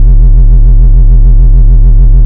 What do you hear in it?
an electronic machine running
bass, machine, electronic, loop